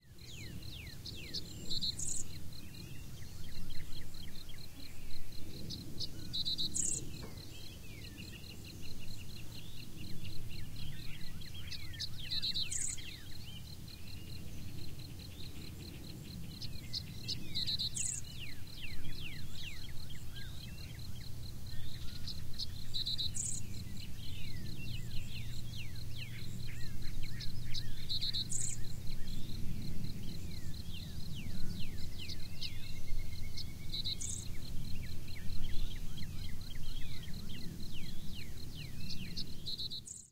SummerFieldBirdSingingMay23rd2015
A peaceful recoding typical of early summer in the Midwest. Recording done in the middle of a big field composed of alfalfa grass and milkweed. Recorded with Marantz PMD661 using two matched stereo, Samson CO2 condenser microphones with my record volume on 7. Saturday May 23rd, 2015, around 6:00PM.